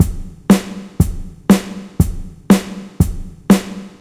Just a drum loop :) (created with Flstudio mobile)
drums, dubstep, 120bpm, loop, synth, beat